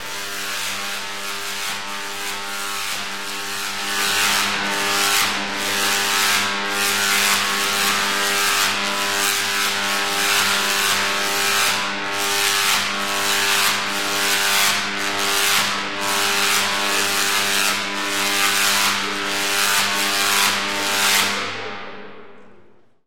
sliding discharge 160KV
Buzz, electric, electric-arc, electricity, high-voltage, jacob, laboratory, s-ladder, tesla, unprocessed, volt, voltage